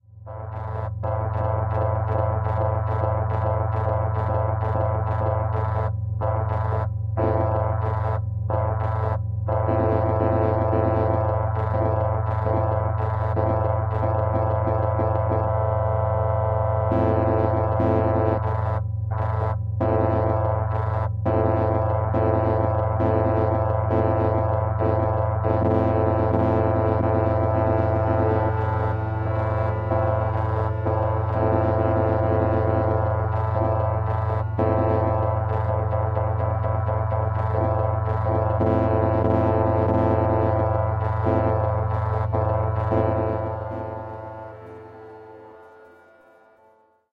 A long chord from a Nord Modular synthesizer processed using real time convolution from a field recording that is playing back random chunks for extra glitches.
quiet, synthesizer, ambient, drone, sound-design, glitch